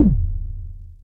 kick lowpassnoise2
I recorded these sounds with my Korg Monotribe. I found it can produce some seriously awesome percussion sounds, most cool of them being kick drums.
analog, bd, drum, filter, kick, low-pass, lpf, monotribe, noise, percussion